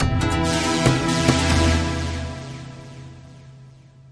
clasic bells7
loop, studio, synth